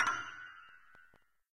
hip-hop style piano
crystal, high, piano